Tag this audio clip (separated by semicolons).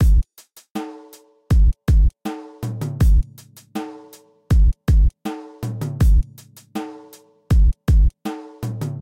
Drum; ambient; electro; loop; rythm